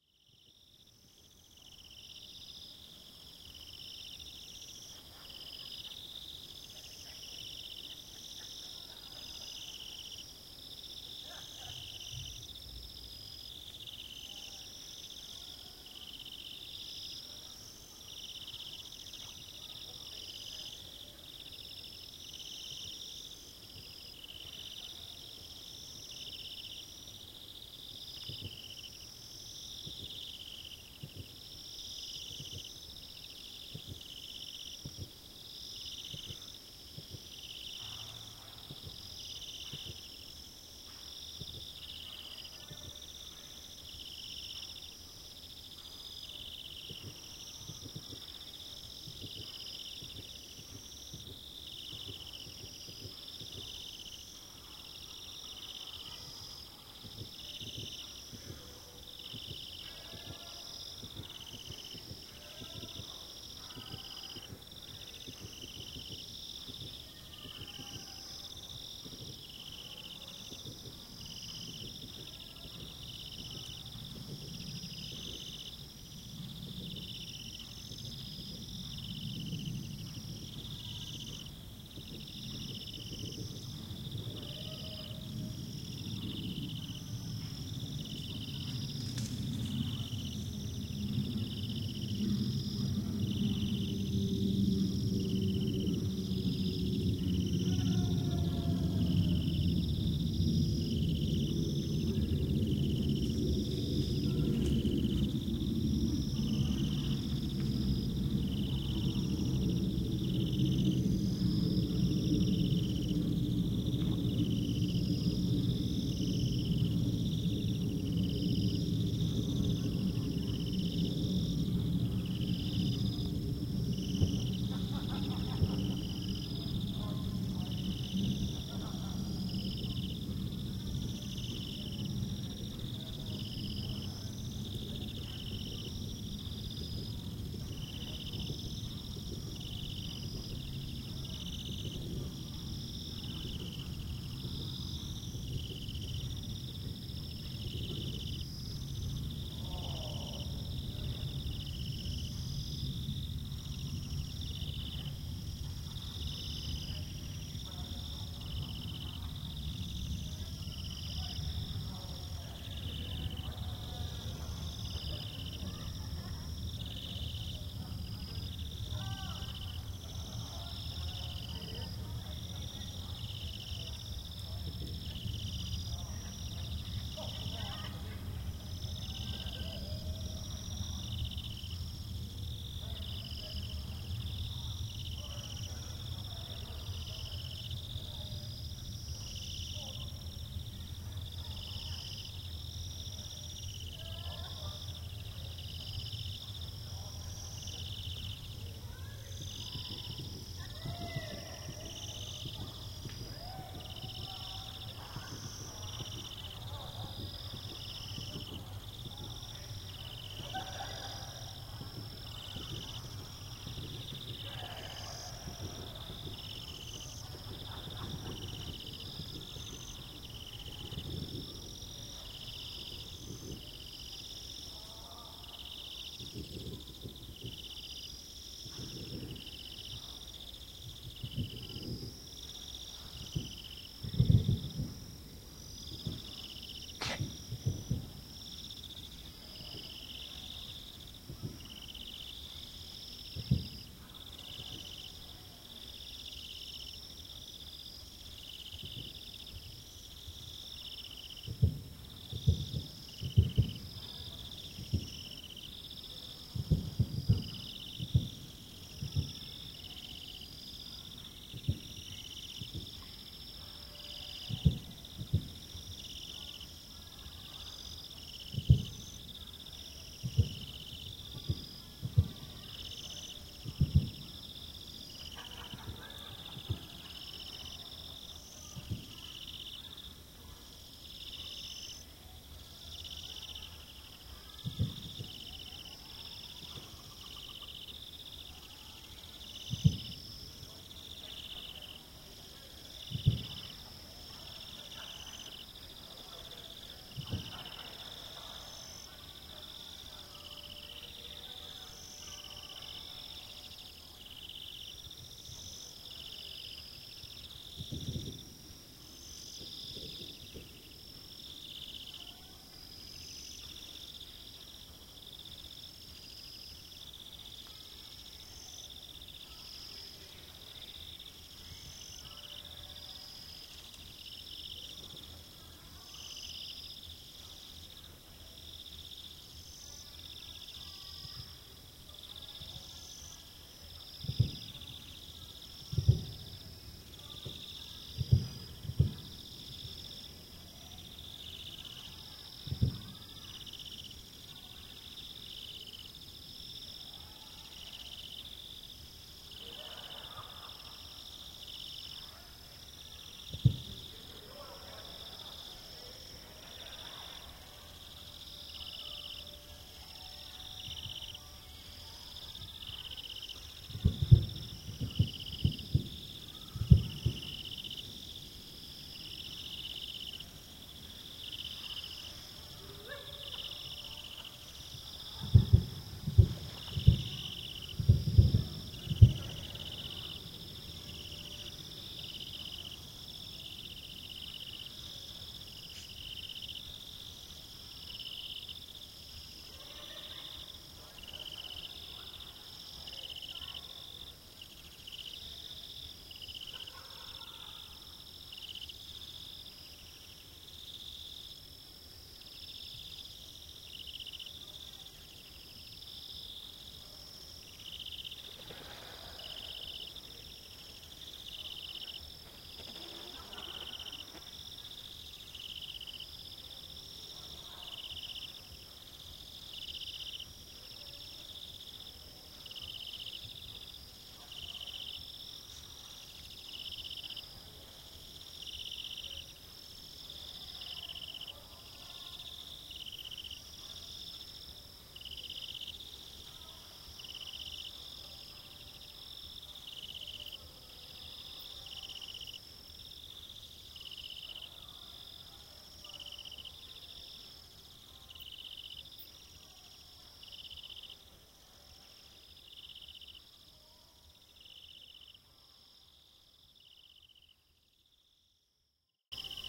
Fryers Forest New Years Eve 2015
Recorded in Fryers Forest in country Victoria, Australia. I placed a my mics on the end of a jetty facing across the small "lake" towards a house where we had our new year's eve celebrations. It was a small gathering of around a dozen people, there was no countdown, in fact midnight crept up on us! In the far distance you can hear fireworks in Castlemaine a small town about 15 km away.
Recorded with and MS setup using a Sennheiser 416 and a Sennheiser MKH 30 into my Zoom H4n